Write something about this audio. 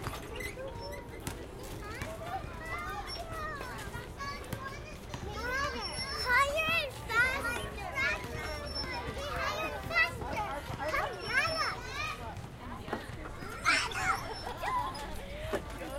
Field recording of kids swinging at a park during the day.